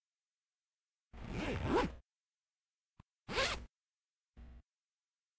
This is a handbag zipper.
First the zipper opening and then the zipper closing.
I used ZOOM H4 HANDY RECORDER with built-in microphones.
I modified the original sound and added equalized, compression and sound reduction.
All with Adobe Audition 2014.